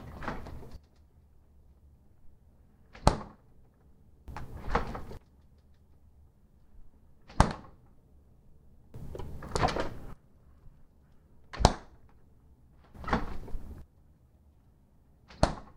fridge-open-close
A refrigerator door opens and closes. The sound of the door opening needed to be amplified after recording.
door-open, refrigerator, door-close